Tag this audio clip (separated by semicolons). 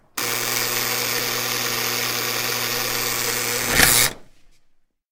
unlock; buzzer; door; ext; apartment